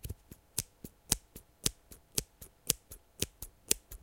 a small pair of scissors. processing: noise reduction.
scissors, cutting